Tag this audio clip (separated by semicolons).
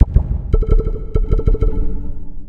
alien; bwah; design; laser; pop